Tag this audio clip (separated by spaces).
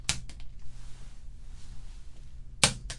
apple box fall